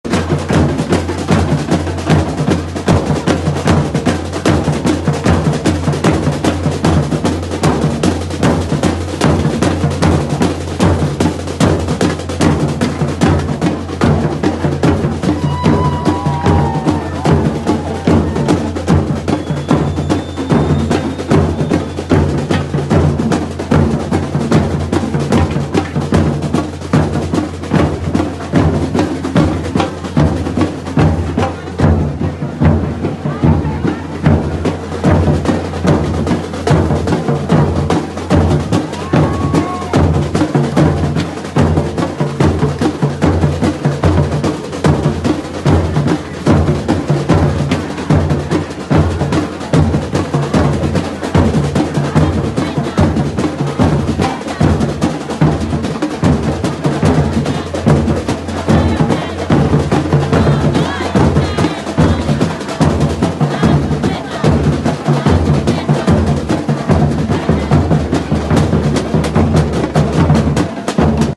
street carnival
music, street